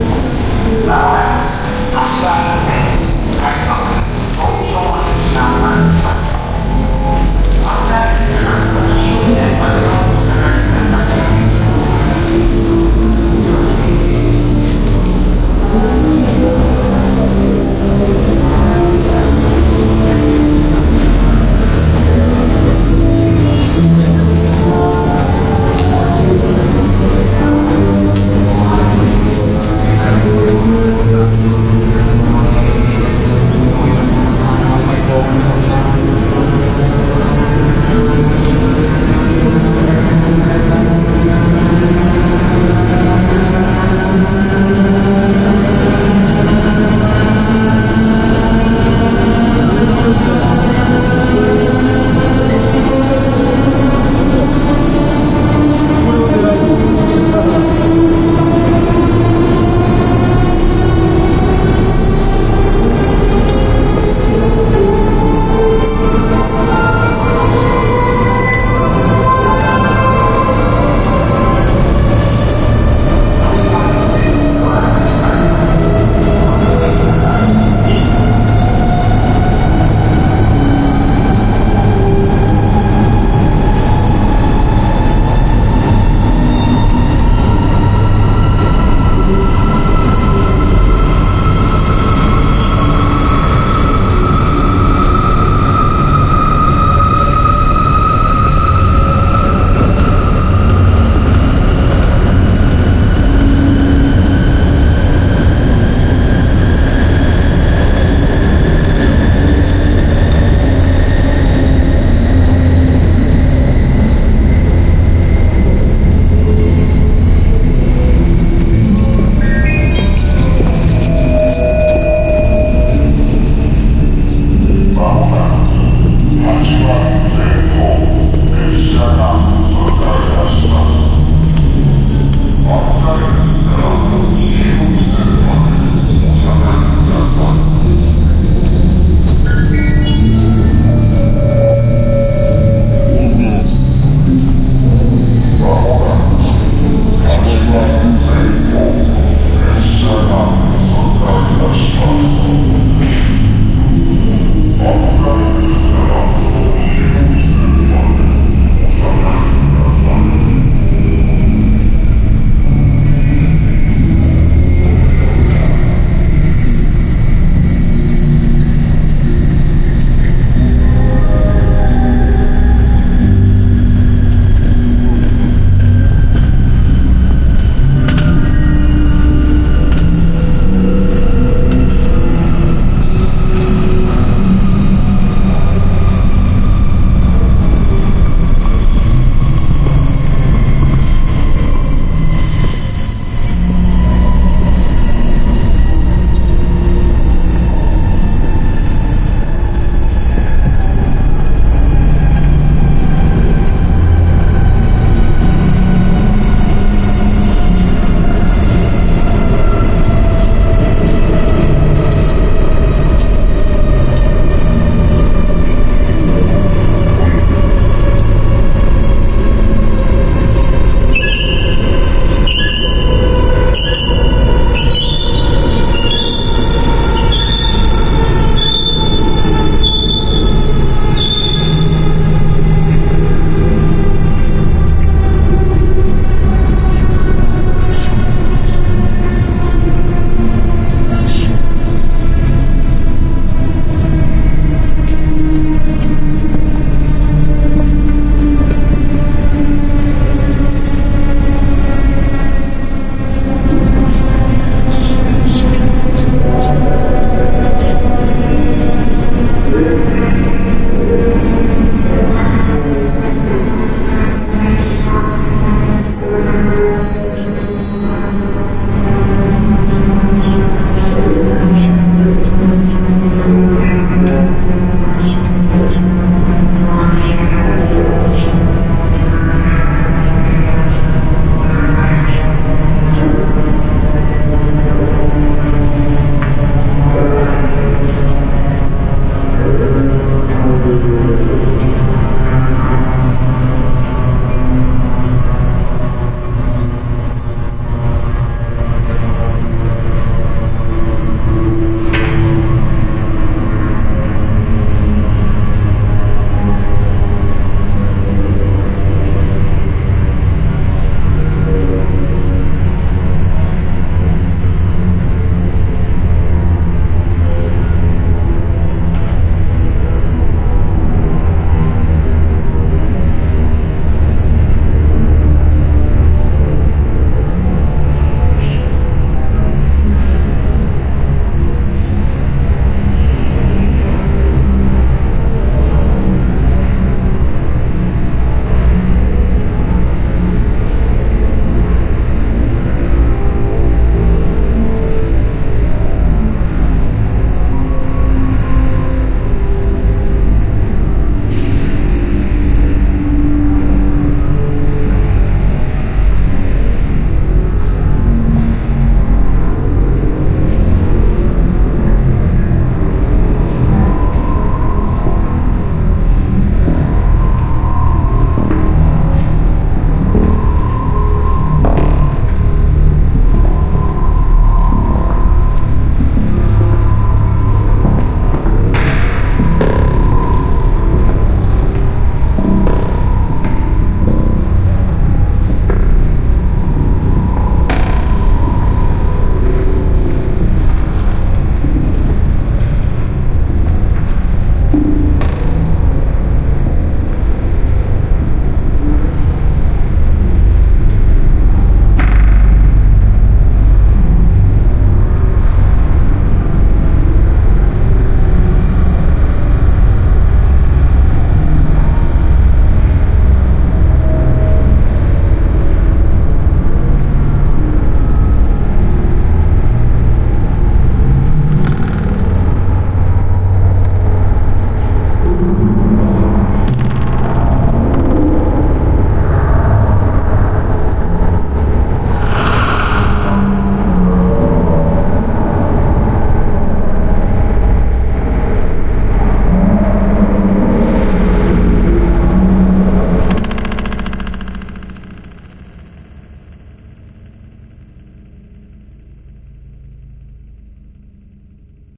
nakano station bad trip
I tried to get a surround recording using mics, but there was a horrible street musician playing off in the distance. I thought it wouldn't be clear in the recording, but one of the mics picked him up quite well. The recording was ruined, so I did a little processing at home and came up with this freaky bit for your listening pleasure. Not sure if it's usable beyond listening, tho...
processed, goat, background, psychedlic, beaver, space-time-continuum, life-after-death, exploding-heart, donkey, night, public-transportation, death, brainfuck, lsd, lamb, space, acid, cityscape, jr, reincarnation, japan, death-before-life, drugs, fish, field-recording, life-before-death, bad-street-musician, chicken, ambience, dying